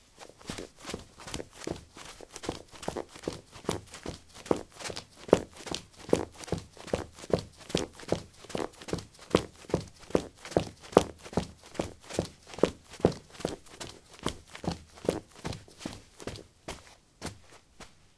carpet 08c womanshoes run
Running on carpet: woman's shoes. Recorded with a ZOOM H2 in a basement of a house, normalized with Audacity.